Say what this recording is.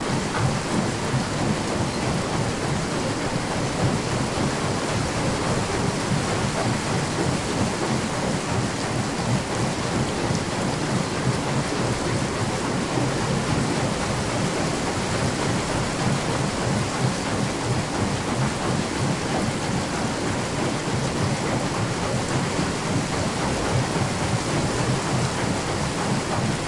We hear the water wheel which drives the whole silk weaving mill turning, and water from the mill-race splashing in the enclosed wheel-house. Its speed varies from time to time.